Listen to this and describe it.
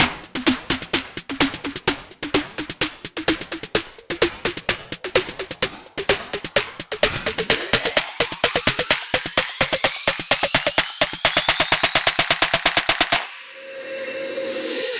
I created these Drum Beat/loops using my Yamaha PSR463 Synthesizer, my ZoomR8 portable Studio, Hydrogen, Electric Drums and Audacity.
128, beat, bpm, buildup, climax, club, Drum, dub, edm, hip, hop, house, hydrogen, jazz, loop, music, rap, rhythm, rock, step, techno, trap